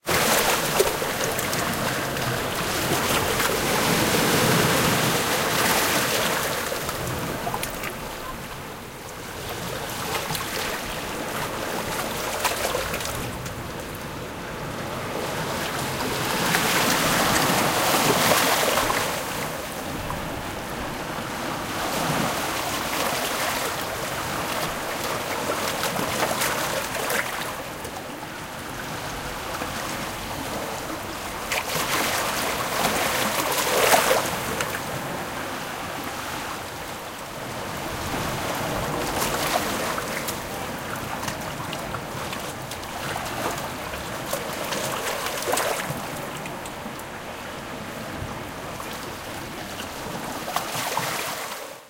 Sea Waves Beach Cave Entrance 01
Sea waves lapping on to sand & pebble beach. This was recorded in the entrance of a small cave.
Recorded 1st September 2017 on the North Landing Beach, Flamborough, UK. Exact same location that was used in the final scene of the movie 'Dads Army'.
Recorded using a Sanyo XPS01m
cave, crashing, Sand, Water, Beach, Waves, Sea, lapping, Pebbles, Ocean